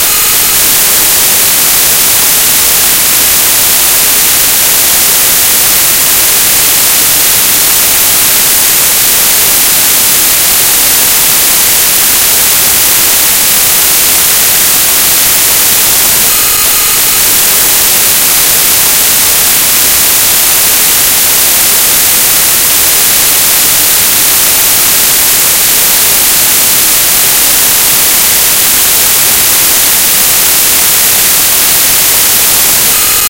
PaAlErkdjah (A-TA KA-WA)
Scanner, PaAlErkdjah